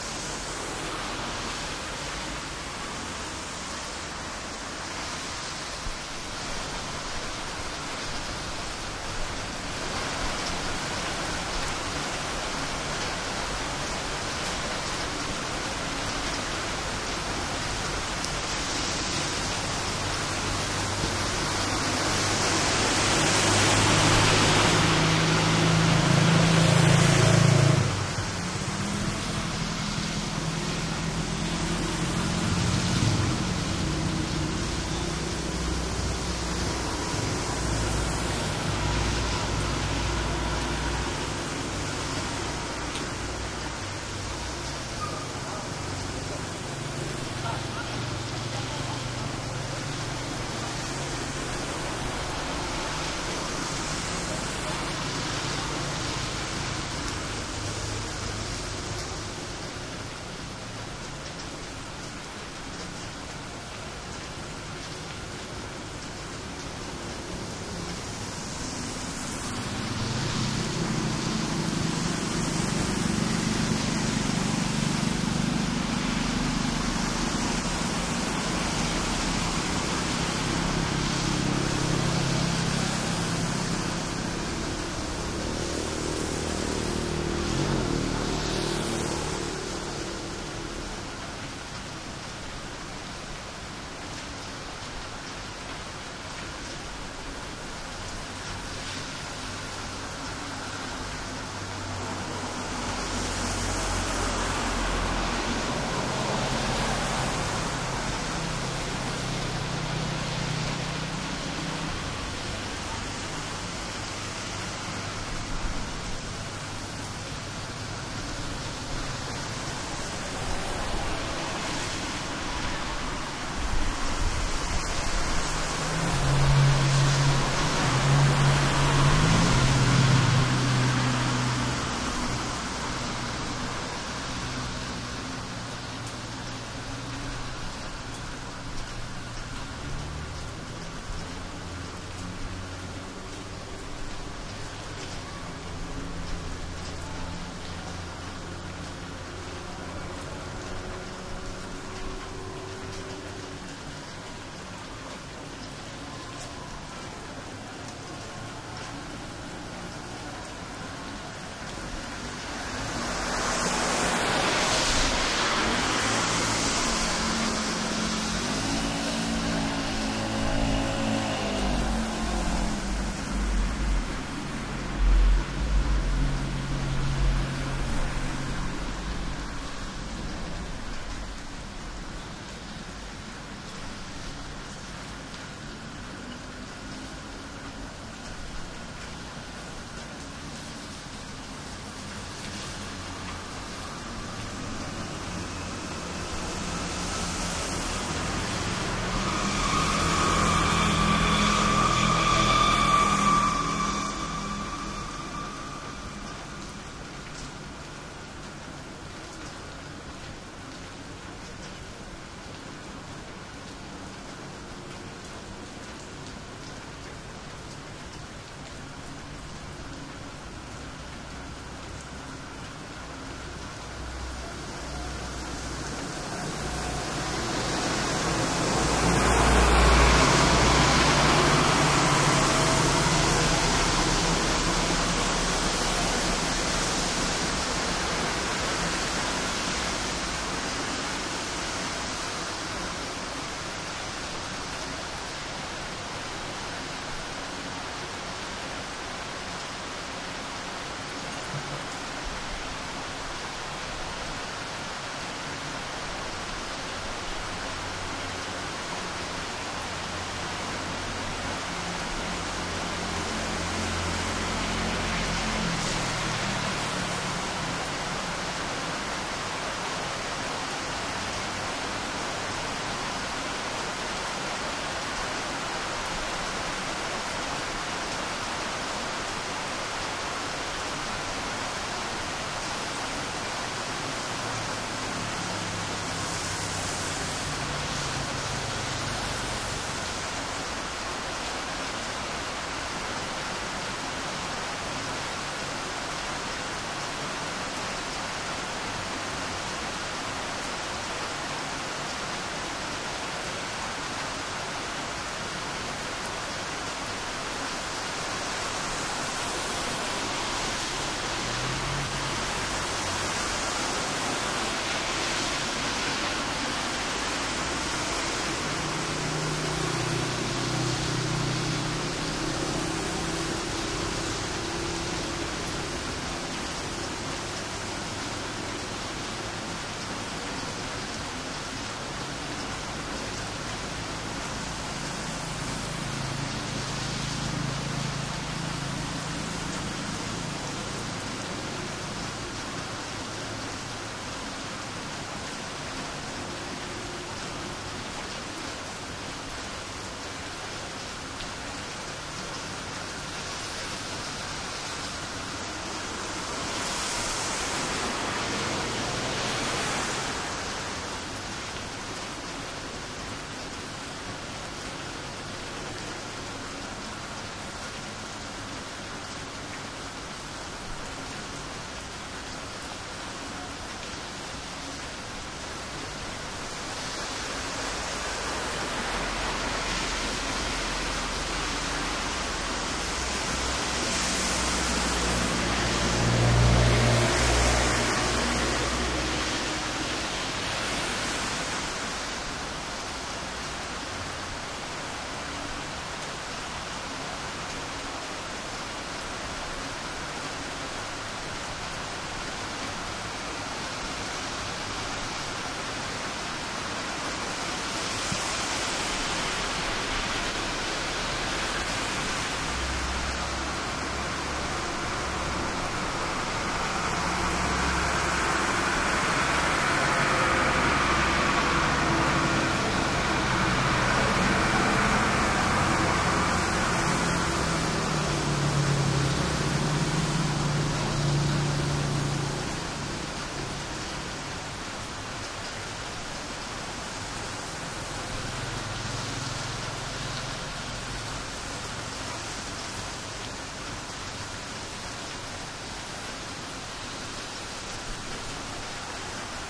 Thailand traffic medium in heavy rain from 5th floor balcony trucks busses motorcycles very wet washy +air conditioner buzz